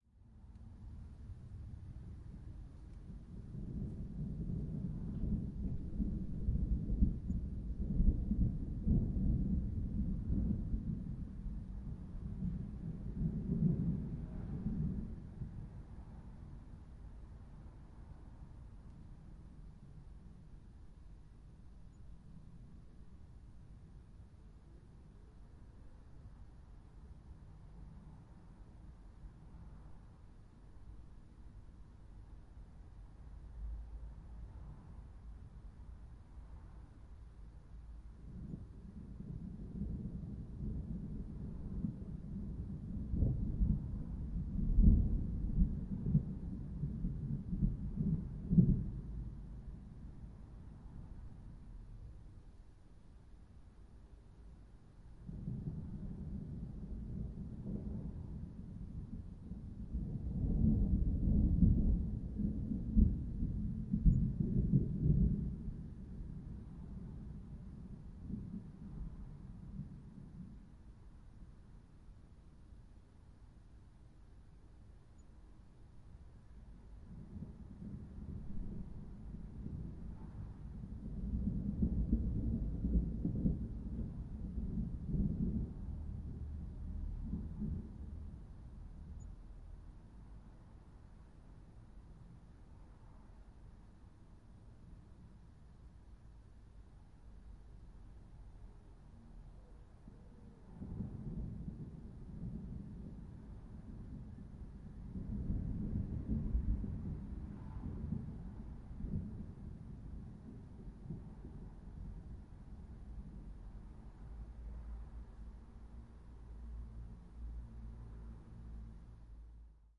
Distant rumbles
Active storm in distance, lots of low rumbles
Olympus LS-12, internal capsules
distant, nature, rumble, storm, summer, thunder, weather